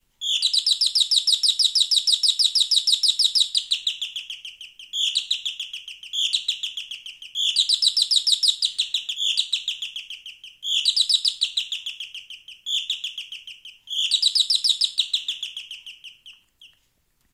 Canary doorbell
electric, doorbell, canary